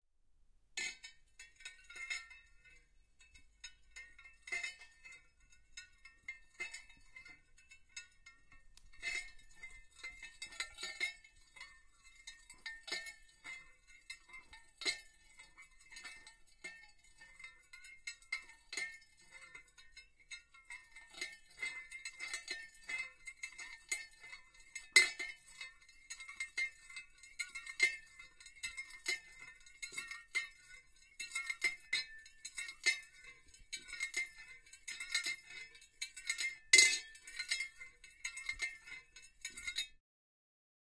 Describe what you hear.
METLImpt Sai Weapon Foley Scrape, Glass Bowl, Long
I recorded my Sai to get a variety of metal impacts, tones, rings, clangs and scrapes.
metal, strike, impact